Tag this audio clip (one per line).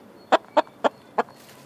animal
buck
Chicken
farm